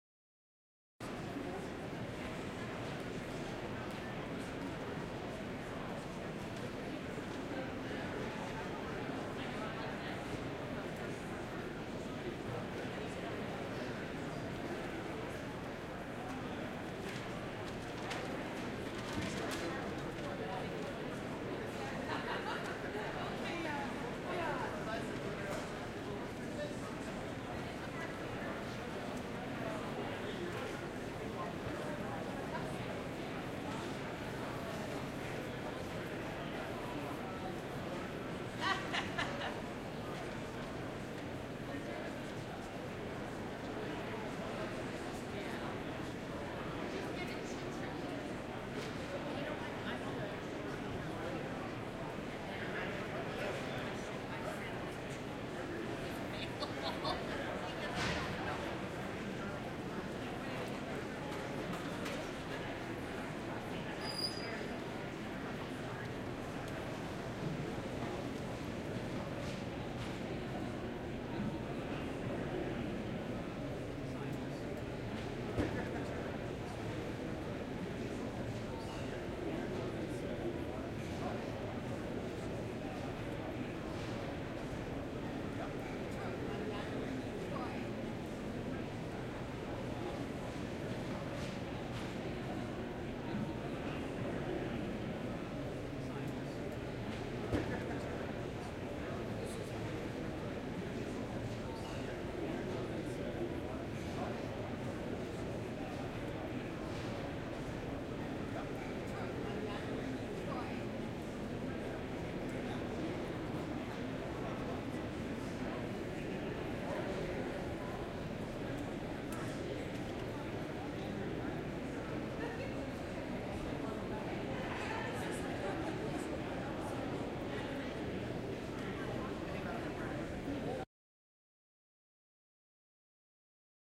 Crowd Medium Tradeshow Large Venue
A few people wandering around a large 50,000 square foot trade show.
Crowd, quiet, Ambience, tradeshow